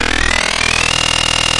Another weird buzzing noise.
robotic, buzz, weird